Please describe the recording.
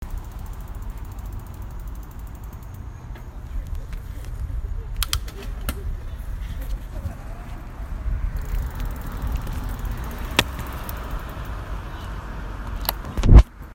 While biking across campus, I shift my gears and wait for traffic to pass as I coast down the road.